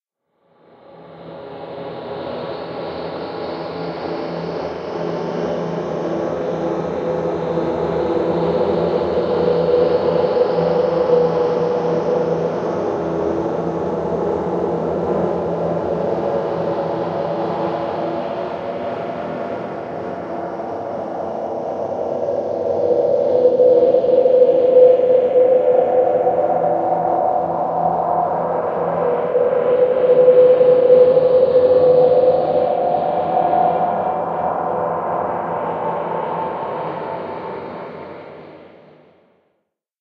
Granular drone with a low-pitched base and occasional higher pitches swells.
ambient, drone, granular